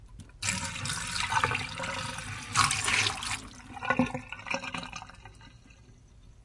dump cup of water in sink
cup, sink, water